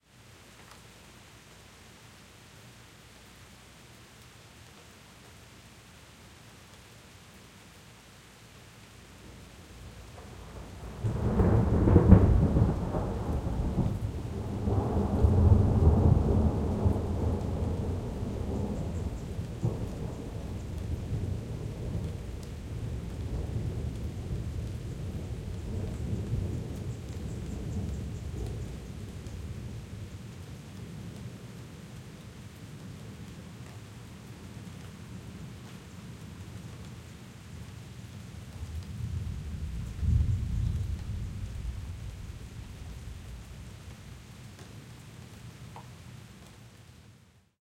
Quick recording of some thunder during light rain. Urban setting with birds quietly chirping in the background. Some heavier water drops can be heard closer to the microphone.
Recorded with a Blue Yeti Pro (stereo mode) with a sock for wind protection through the Scarlett 2i4 interface.